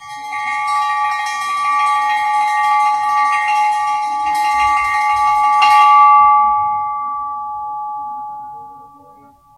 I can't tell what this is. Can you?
These are sounds made by hitting gas bottles (Helium, Nitrous Oxide, Oxygen etc) in a Hospital in Kent, England.
bottle, gas, gong, hospital, metal, percussion